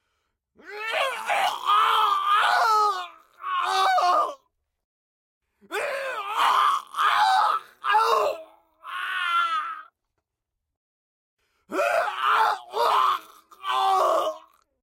Just a good all around mortally wounded scream for your mortally wounded screaming needs.